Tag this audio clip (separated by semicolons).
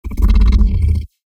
sound-effect; sound; lion; roar; movie; growl; fx; low; weird; dinosaur; scary; beast; soundeffect; monster; dragon; horror; sfx; creature; growling; film; animal; alien; zombie; game